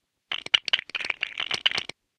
One of several classic Lego star wars sounds that i recreated based on the originals. It was interesting...legos didn't really make the right sounds so I used mega blocks.
block, wars, form, lego, explode, mega, star, crash, build, fall, click